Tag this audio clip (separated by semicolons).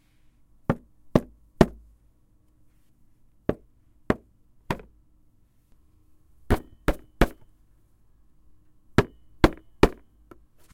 box; cardboard; hit; impact; thud